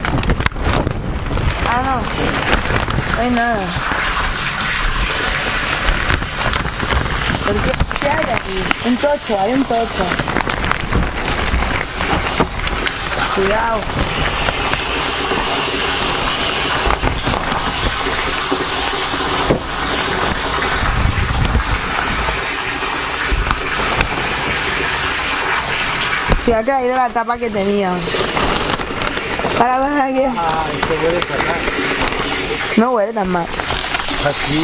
water entering in the deposits so we can drink it!
fluids, liquids